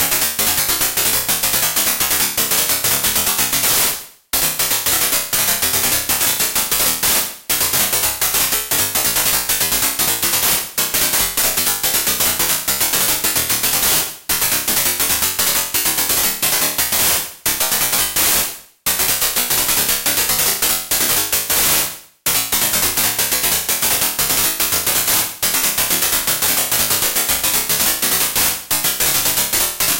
tense tonal robotic transients [128] [16bar]
As with most files in this pack, this would have been made starting with noise or a simple tone that was progressively mangled and resampled multiple times, primarily through abusing the Warp feature inside of Ableton Live.
This one immediately makes me feel very tense. It's like being shouted at.